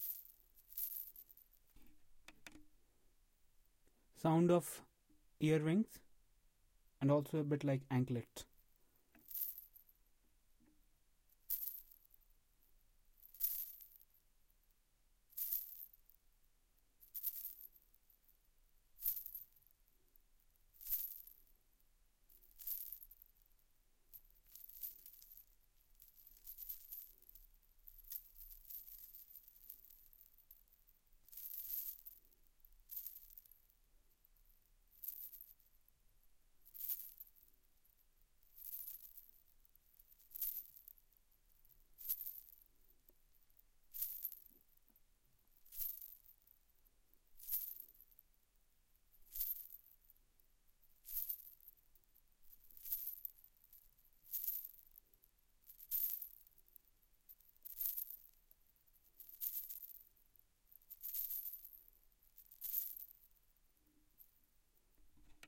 Earring Anklet Payal Jhumka Jewellery
Some jewellery sounds